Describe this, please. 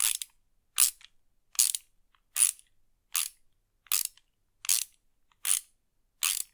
Ratchet Wrench Avg Speed Multiple
A socket wrench ratcheted at an average speed. 2 more variations of this sound can be found in the same pack "Tools". Those are at a slower and faster speed.
changing, repair, wrenching, cranking, ratcheting, mechanic, tire, worker, mechanical, ratchet, tool, spanner, tools, wrench, socket, metal, working, crank